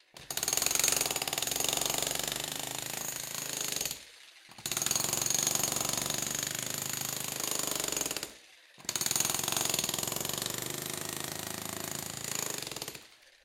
Using a jackhammer indoors to break concrete. Recorded with a Zoom H5 and a XYH-5 stereo mic.
building, chisel, concrete, constructing, construction, demolish, tool